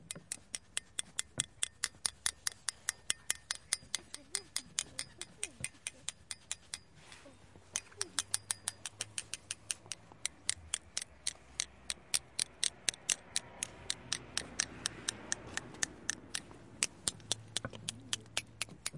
Sonicsnaps-OM-FR-Taper-sur-unpoteau
Tapping a bollard with a pen.
field, Paris, recordings, snaps, sonic, TCR